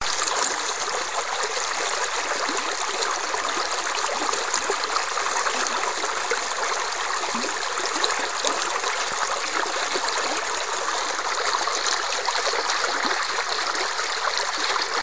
Sound of a creek
you can loop it